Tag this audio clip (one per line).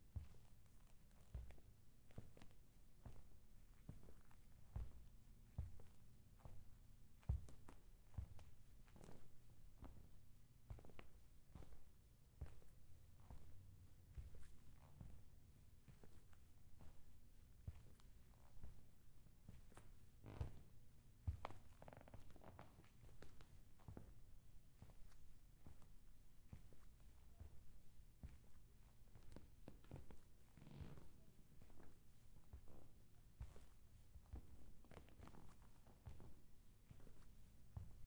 studio
walking
footsteps